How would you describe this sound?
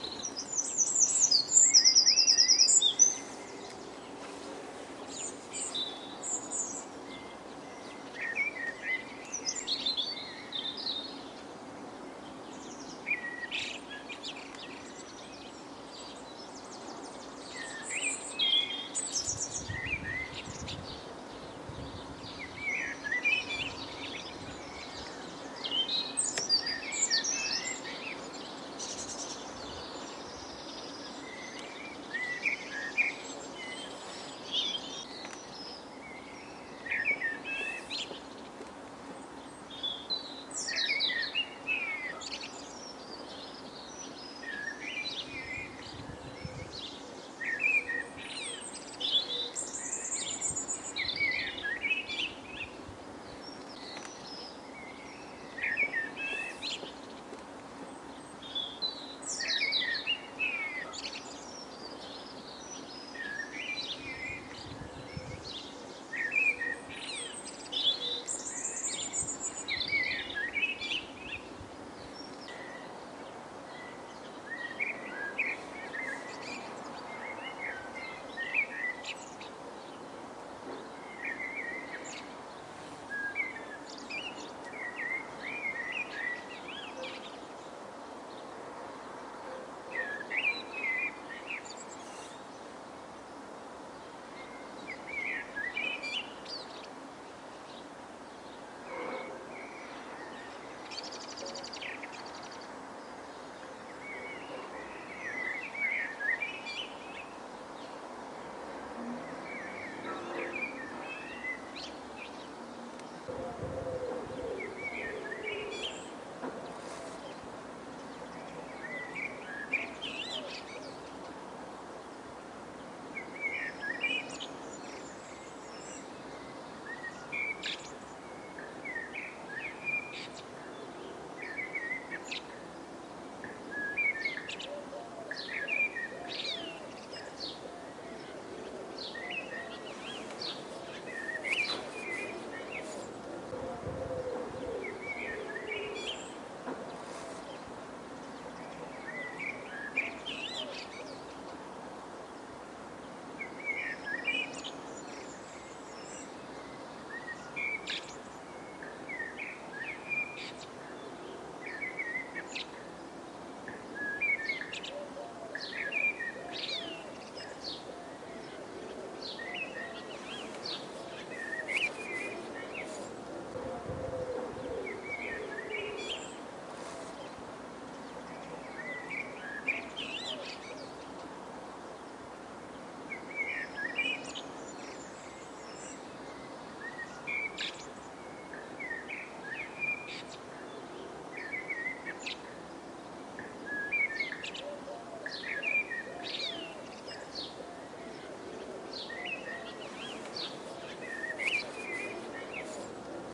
Bluetit sparrows blackbird audio
Audio from video clips recorded this morning, Thurs 29 April 2021, in South Yorkshire. Blue tit right at the start of the recording. Some breeze has got picked up by the microphone on the camera. The bluetits are nesting in our garden and I've been filming them coming and going. They often perch and sing before going into the nestbox. Sometimes it's other bluetits visiting the garden rather than just the two who are nesting.
blackbird, bluetit, field-recording, garden, sparrows